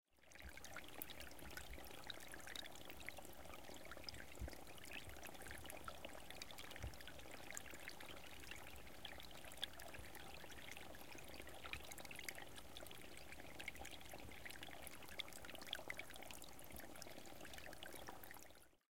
A small stream in the woods of Finland. Soft and gentle.